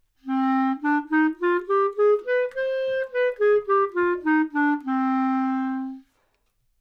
Clarinet - C natural minor
Part of the Good-sounds dataset of monophonic instrumental sounds.
instrument::clarinet
note::C
good-sounds-id::7640
mode::natural minor
scale, good-sounds, minor, clarinet, Cnatural, neumann-U87